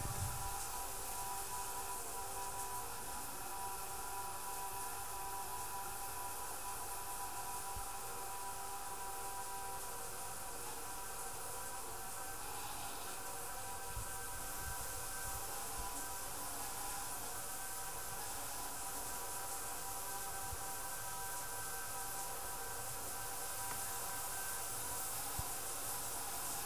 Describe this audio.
dutxa ext
the sound of a shower recorded from outside the bathroom